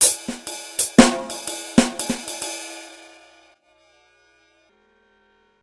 jazz beat using an SPD-20